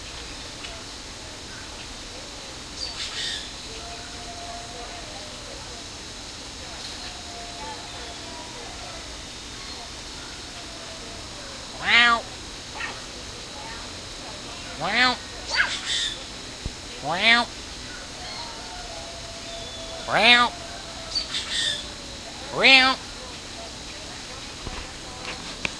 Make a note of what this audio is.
Talking to a cougar recorded at Busch Wildlife Sanctuary with Olympus DS-40.
cougar, field-recording